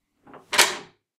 Bolt Lock 1
deadbolt / lock being used
Bolt, chest, key, Lock, Metal, turn, Unlock